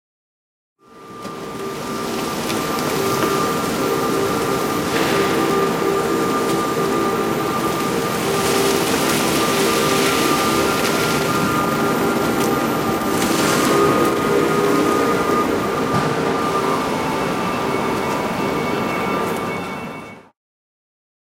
MITSUBISHI IMIEV electric car DRIVE past ext 2
electric car DRIVE
DRIVE, car, electric